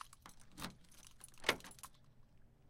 Cardoor lock
locking car door recorded with SM58
car, car-door, keys, lock